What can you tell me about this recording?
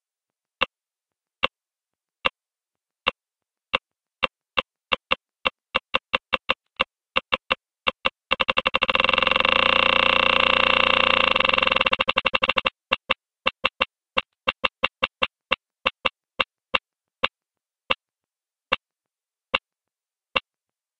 A dual mono simulation of a geiger counter passing over a hotspot.
clicks, geiger-counter, instrument, mono, particle-detector, radiation